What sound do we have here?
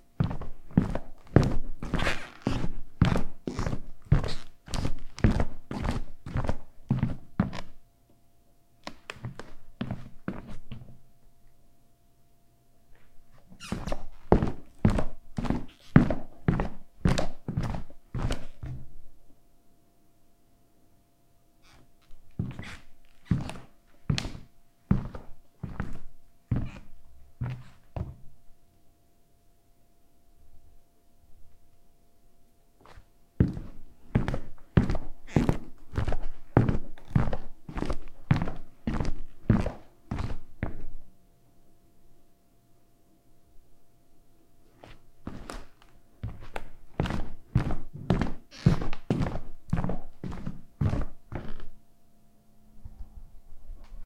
foosteps sneakers
Walking around my apartment in a pair of New Balance trail running shoes with a rubber sole. There are a couple different stops and speeds. Recorded with Rode NTG-2 mic into Zoom H4 and edited with Spark XL.
feet, floor, foley, hardwood, shoes, steps, walk